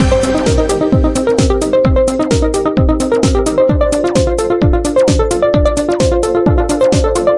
Short space theme
Created using FL Studio 12. I hope this is usable.
Tempo: 130bpm.
130-bpm, dance, electro, electronic, loop, music, space, theme